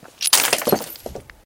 Breaking Glass 24
Includes some background noise of wind. Recorded with a black Sony IC voice recorder.
break, crash, glasses, shatter, smash, glass, breaking, crack, pottery, splintering, shards